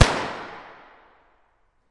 Heathers Gunshot Effect2
A gunshot sound effect recorded for a high school production of the musical Heathers. It was recorded on a Zoom H1 and was made by a theatrical cap gun. I recorded it at a distance of about 5 feet and then again at a distance of about 40 feet from the audience and allowed the sound to reverberate both times. Then I duplicated both and mixed in both original sounds on top of each other, plus each sound pitched down 1 octave and the 40-feet away recording at 2 octaves down, plus the closer recording pitched one octave up. We then added and adjusted reverb in Audacity and adjusted the sync of all the clips to be aligned, and adjusted the mix to make it sound as real as possible.
Gun, Gunfire, 38, Pistol, Gun-shot, Revolver, Special, Rifle, Cap, Gunshot